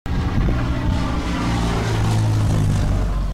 Propeller plane flyby
A small, single-propeller plane recorded flying over my head at low altitude, about to land in the nearby airport. Got lucky with this recording, as it passed by while i was waiting for the bus. Good sound for running motors in general.